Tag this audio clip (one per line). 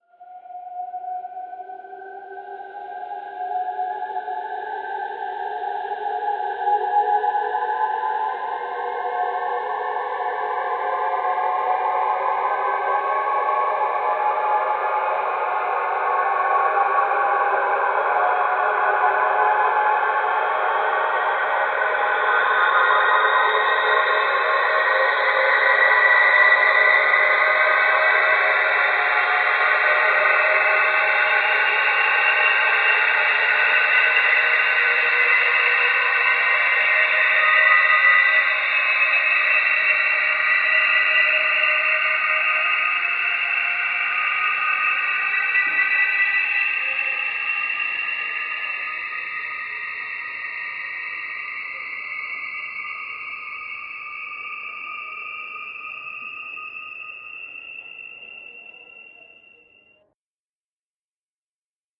ambient
reaktor
soundscape
space
sweep